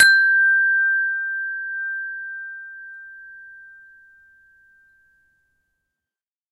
windchime tube sound
tube, windchime